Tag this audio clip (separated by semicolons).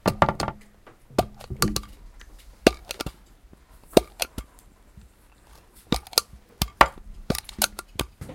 Rennes; CityRings; France